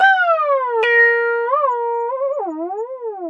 talking synth (f minor)
created with abl3 vst synth
abl3, acid, synth, tb303, techno